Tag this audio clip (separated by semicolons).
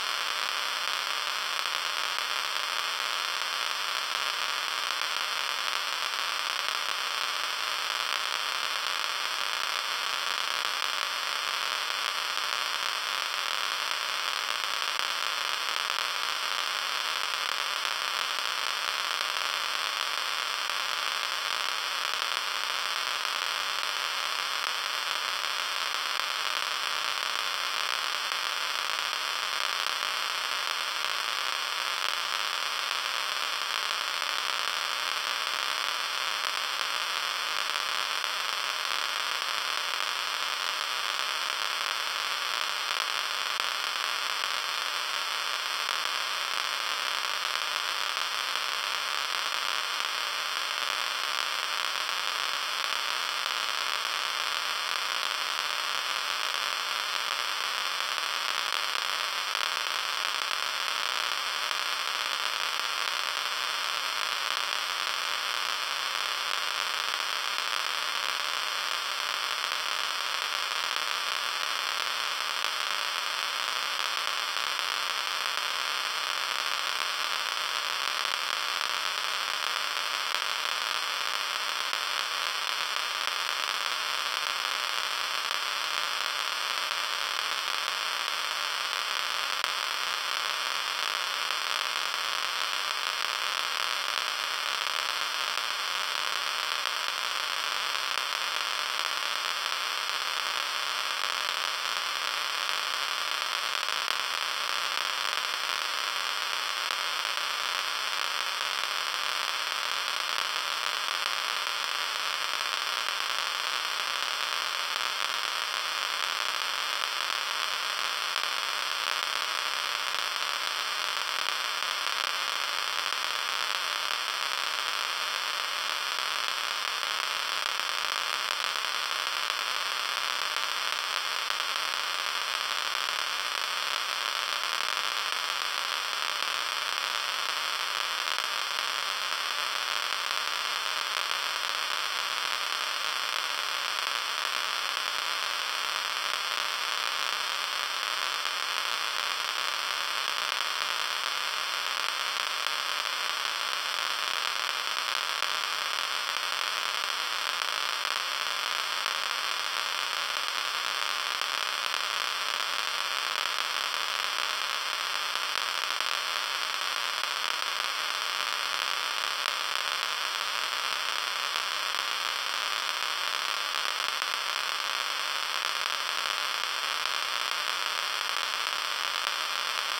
extreme; click; geiger; high; counter; geiger-counter; radiation; very-high